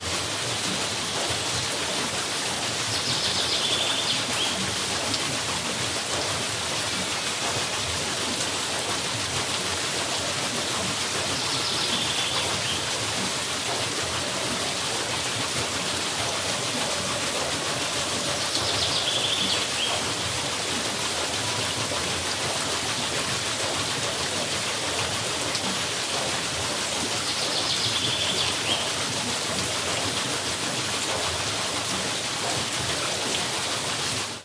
Old water mill Arnhem water flowing away
Field-recording of an old water mill. Zoom iQ6 X/Y stereo.